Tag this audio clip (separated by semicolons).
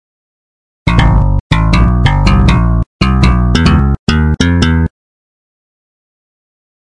wierd; strange; chaotic; bass